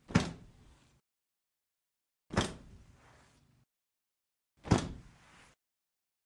Body Hit Coat Against Wall
The sound of a body or cloth bag hitting a wall or floor